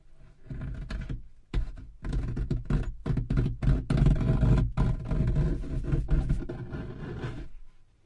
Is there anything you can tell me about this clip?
recordings of various rustling sounds with a stereo Audio Technica 853A